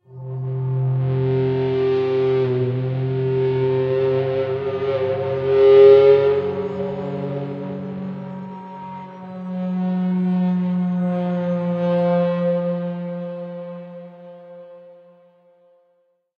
Hello friends!
HQ dark ambient pad. Best used for horror movie, game dark scene etc.
Just download!
Enjoy! And best wishes to all indie developers!